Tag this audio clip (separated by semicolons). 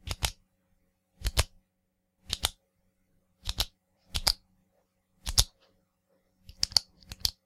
press; switch; torch; click; button; off; unpress; flashlight